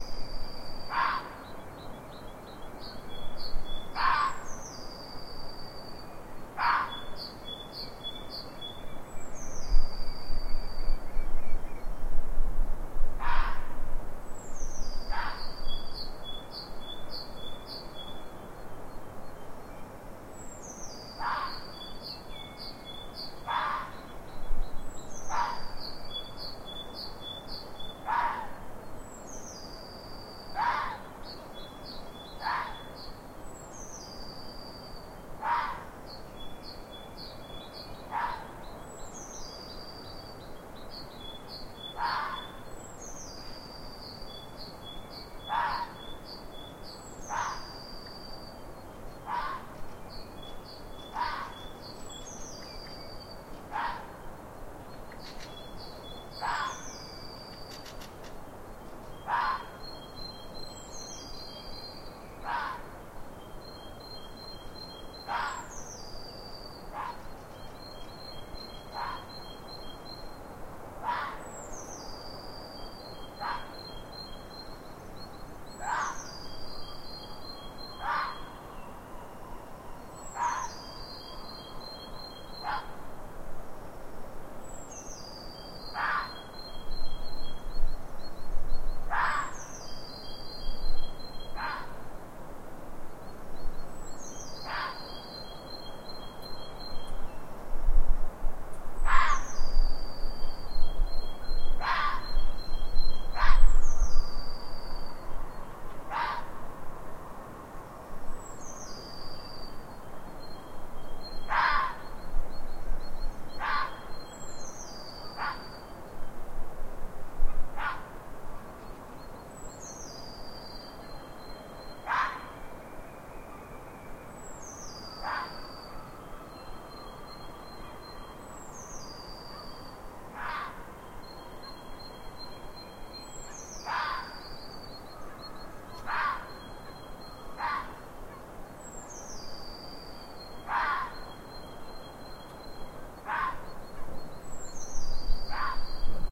A lone fox in heat during a spring night in norway while birds are chirping their heads off